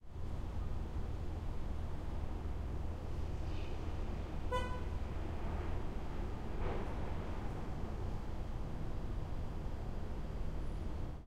Car Horn Honk 02 - Single, Distant - EXT Day Brooklyn Street corner ambience
This is a field recording from a window on a street corner in Williamsburg, Brooklyn, during a summer afternoon in August 2019. This is a short clip of a single distant horn honk from a car.
This is a short clip intended to be a background texture for sound design, longer version also available.
Recorded with a Neumann Stereo Pair into the Sound Devices 633.
ambiance, Ambience, Ambient, atmos, atmospheric, Brooklyn, car, city-rumble, Field-recording, honk, horn, New-York-City, NYc, traffic